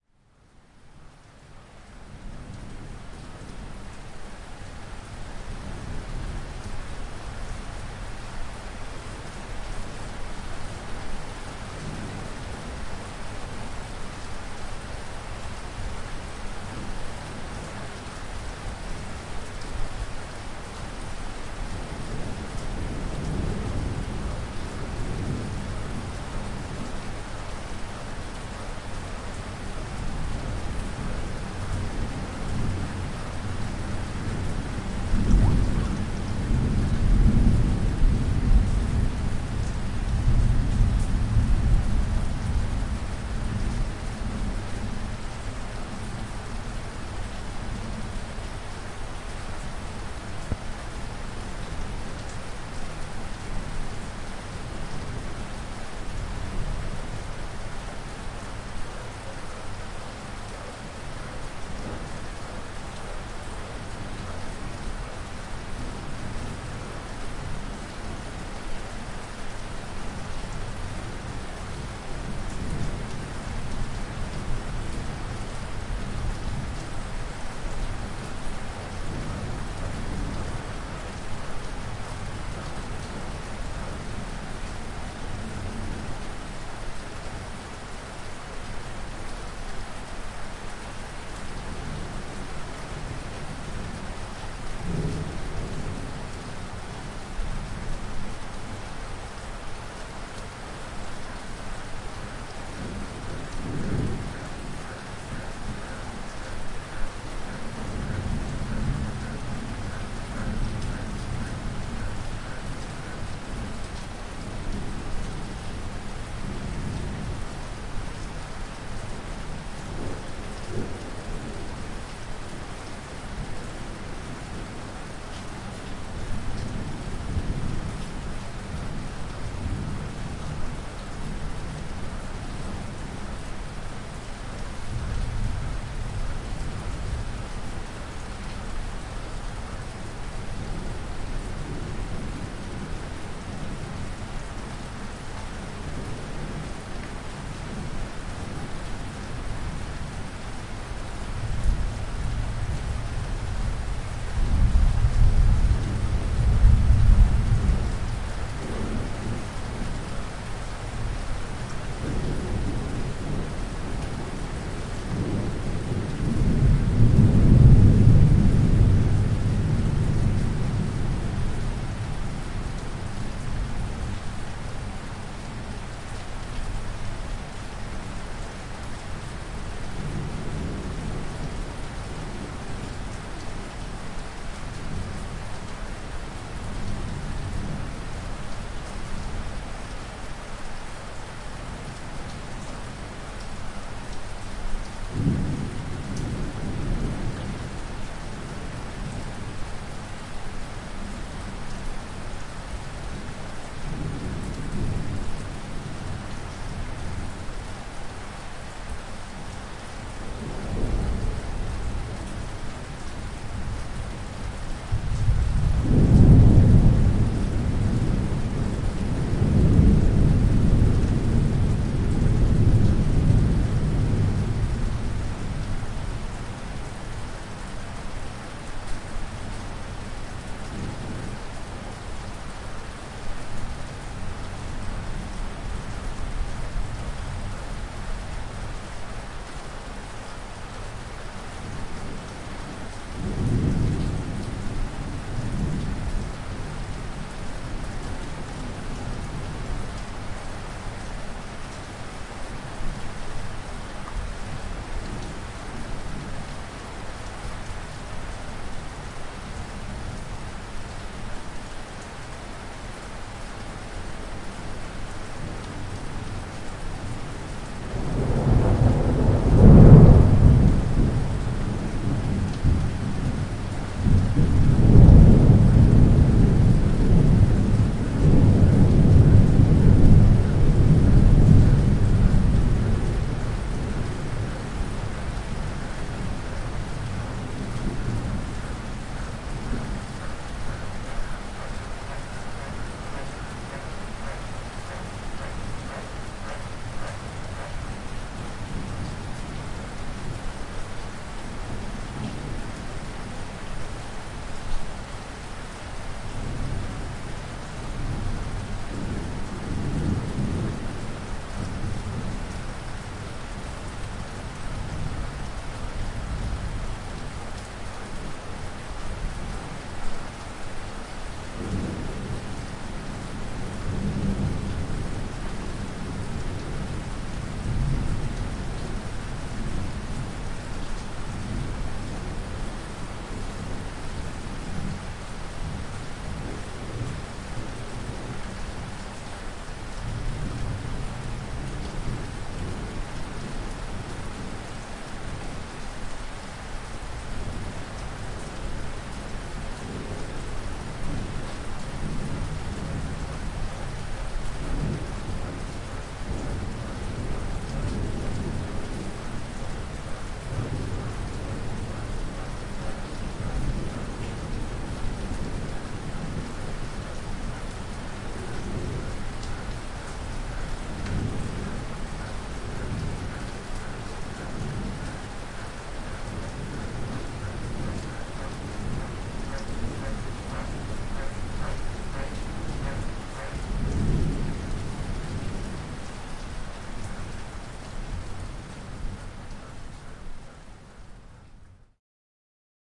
Interior Atmos Rain Thunder - Darwin
It is 2am on a barmy November evening. I was awoken to this beautiful sound.